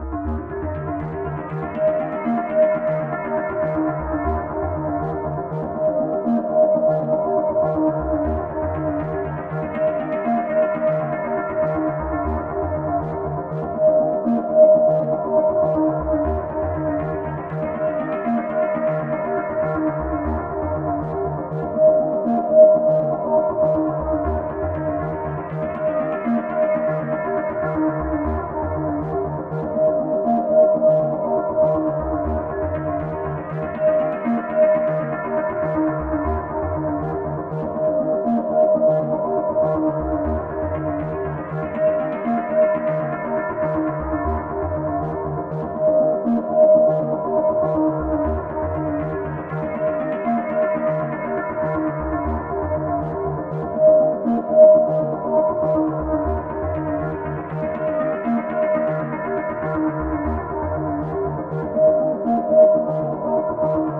ARPS A - I took a self created Juno (I own an Alpha-Juno 2) sound, made a little arpeggio-like sound for it, and mangled the sound through some severe effects (Camel Space, Camel Phat, Metallurgy, some effects from Quantum FX) resulting in 8 different flavours (1 till 8), all with quite some feedback in them. 8 bar loop at 4/4 120 BPM. Enjoy!